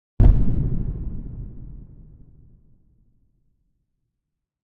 explosion bomb
Explosion create with grown noise and closing door + some effects ofc :)
battle, bomb, explode, explosion, explosive, war